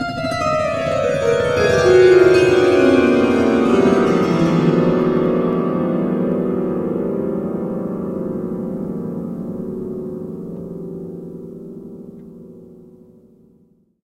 piano harp down 5
Grand piano harp glissando recorded on Logic Pro using a Tascam US-122L and an SM58
glissando, harp, magical, piano, pluck